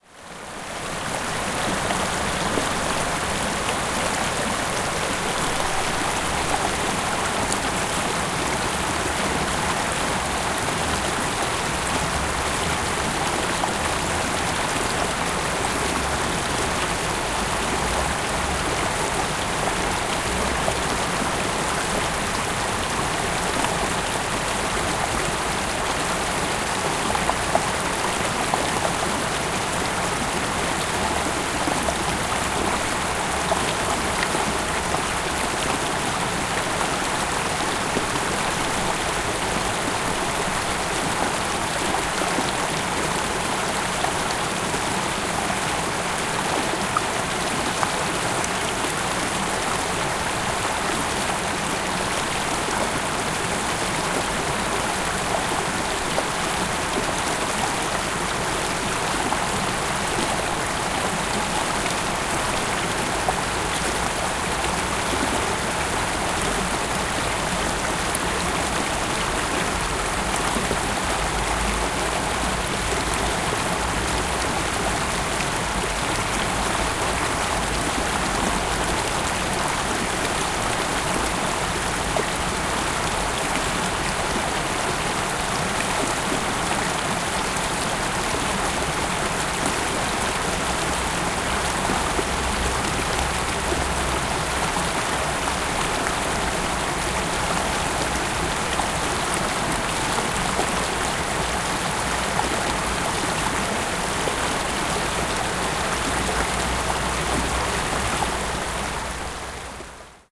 0289 Cheonggye stream 4
Water from Cheonggyecheon stream.
20120608